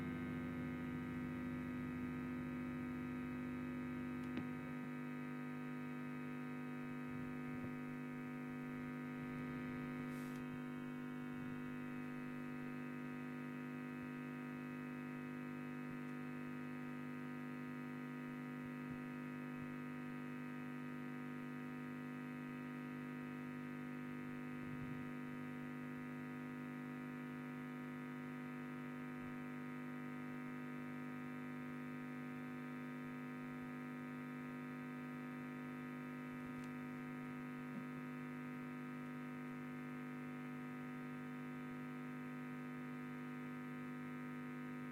Orange Guitar Amp Noise
The hum of an orange electric guitar amp.